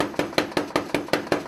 Power hammer - Billeter Klunz 50kg - Quantized forging red glow 8 hit
Billeter Klunz 50kg forging hot steel, quantized to 80bpm (orig. 122bpm) with 8 hits..
tools, blacksmith, labor, forging, crafts, red-hot, 2beat, machine, work, metalwork, 80bpm, quantized, motor, power-hammer, billeter-klunz